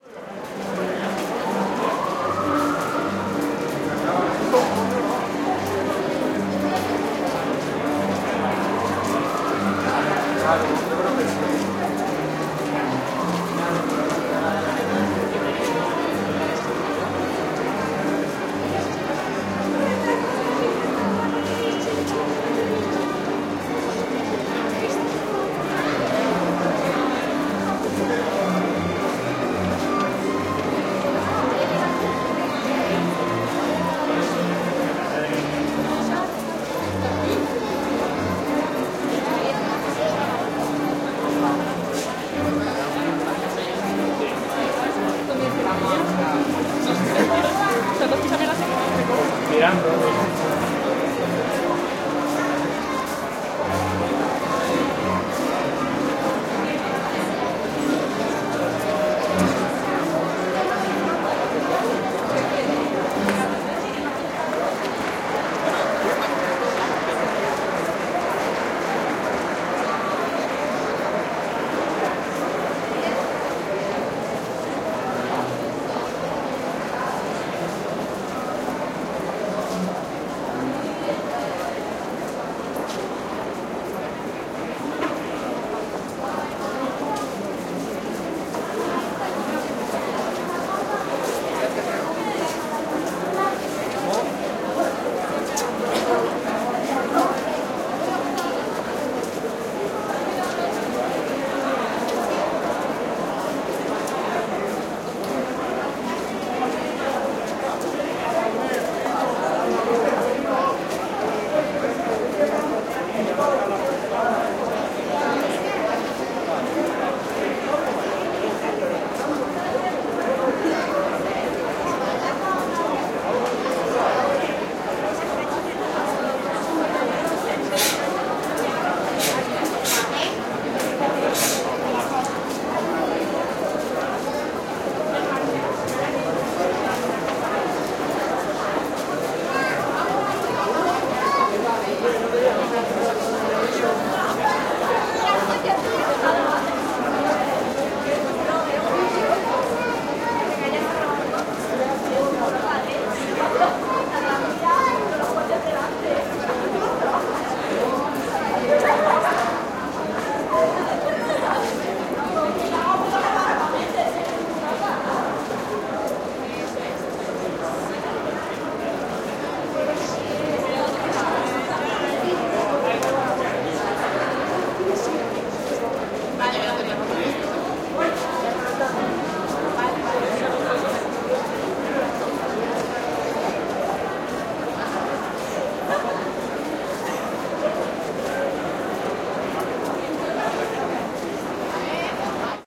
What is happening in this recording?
Romanian musicians playing in the center of madrid
A group of romanian street musicians performing his music in a street in the center of Madrid city.
Ambience, crowds, field-recording, Madrid, music-live, people, Romanian-musicians, street-musicians